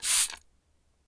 Window cleaner spray sound. 2 similar sounds and different spray bottle sounds are available in the same sound pack.